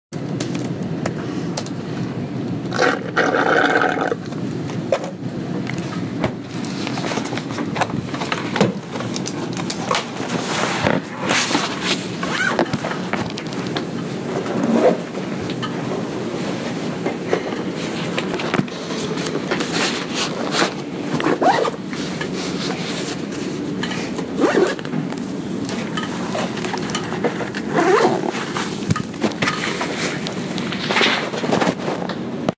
Students finishing their coffees and packing up after a long, hard study session.